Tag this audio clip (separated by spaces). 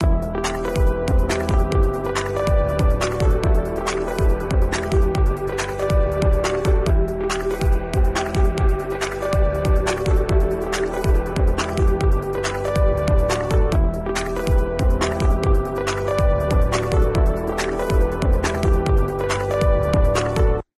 80s dark evil future synth